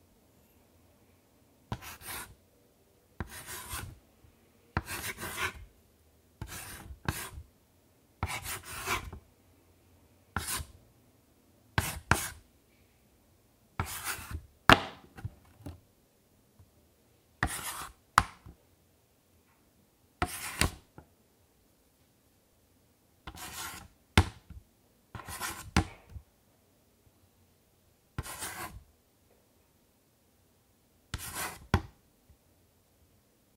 chalk on wooden slate
Gestures on a small blackboard with chalk. No full words, actually numbers from one to five and then some interrogation marks.
Recorded on a MixPre6 with a Samson C01.
blackboard, board, chalk, slate, wooden, writing